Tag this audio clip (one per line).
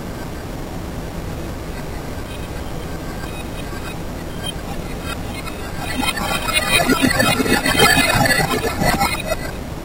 fx
noise
reversed